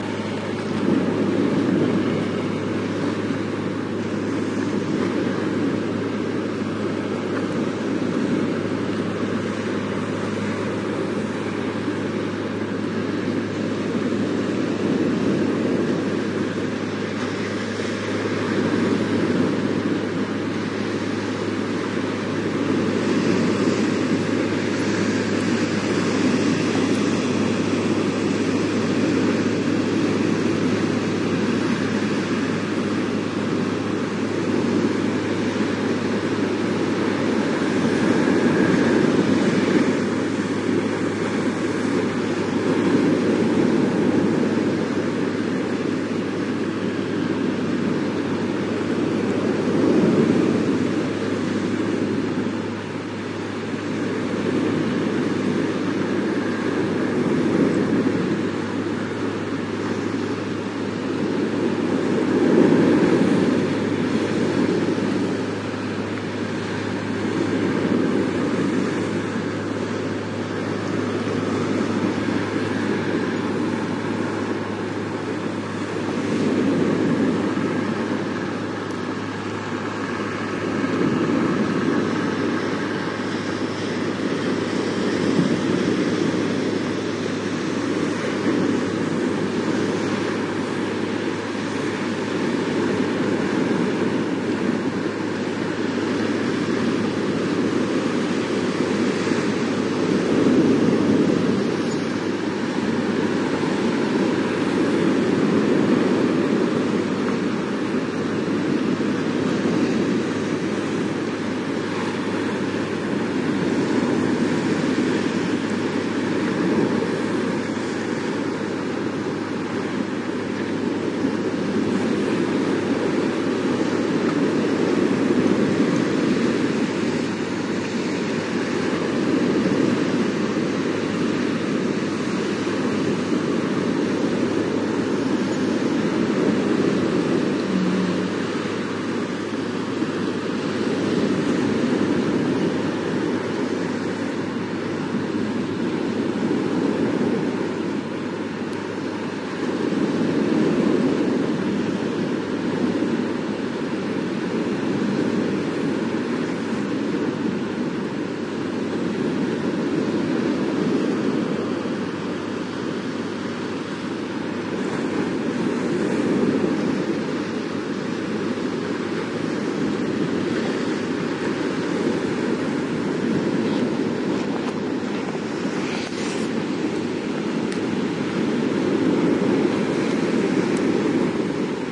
20130531 surf.fishing.vessel.05
Surf noise + the noise of a fishing boat engine in the distance. Primo EM172 capsules inside widscreens, FEL Microphone Amplifier BMA2, PCM-M10 recorder. Recored near Cabo Roche (Cadiz Province, S Spain)
beach, field-recording, ocean, Spain, splashing, surf, waves